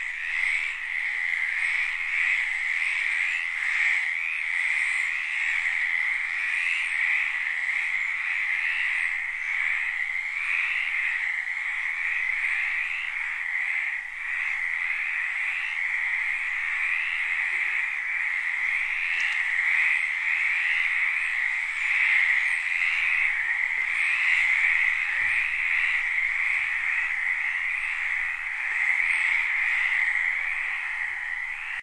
tree frog concert
Concert of tree frogs, April 1999, St.Sernin, SW-France. Mono-mic, Dat-Recorder
frog, ambient, field-recording